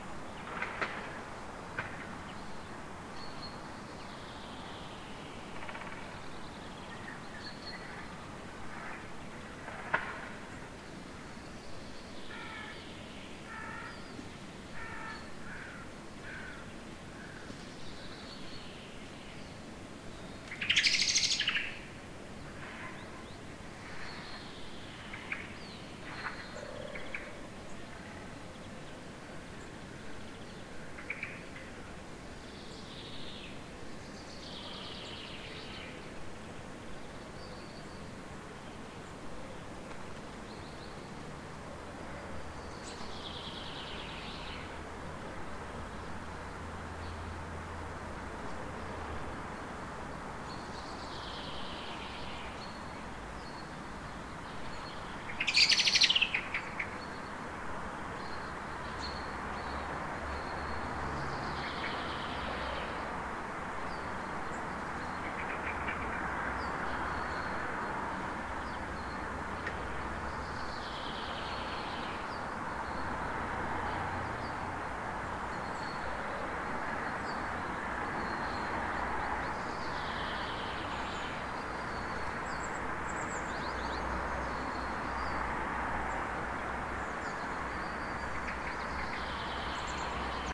garden03 04 (Surround L)
Recorded with Zoom H2 at 7:30 am. Near street-noice with several birds
morning, birds, garden, 6channel, graz